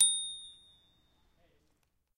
A single strike of a bike's bell